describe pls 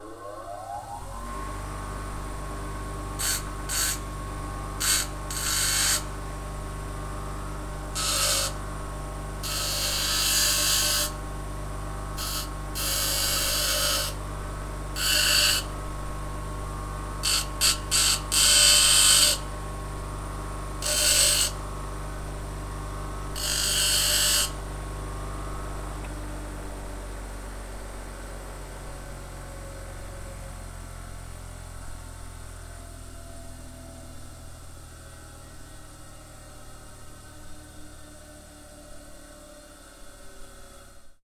Bench-grinder
a bench grinder
bench, grinder, a